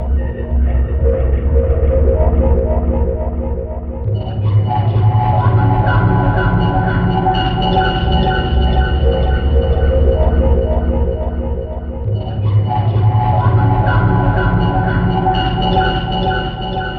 A deep atmospheric pad with a dark feel